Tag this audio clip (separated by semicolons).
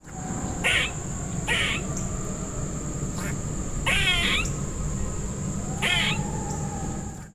animal; field-recording; nature; outdoor; squirrel